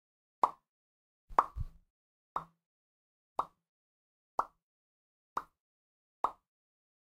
sound produced by mouth
This sound is generating by mouth.